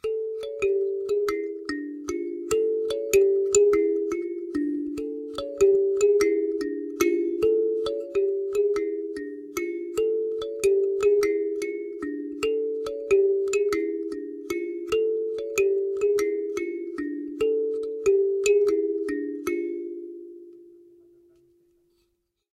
Kalimba (easy melody 3)
A cheap kalimba recorded through a condenser mic and a tube pre-amp (lo-cut ~80Hz).
Tuning is way far from perfect.
thumb-piano, kalimba, melody, piano, african, instrument, thumb, loop, ethnic